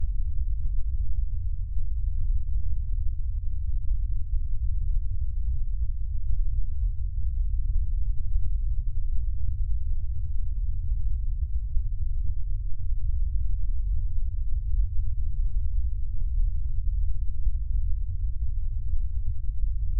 Very low frequency drone.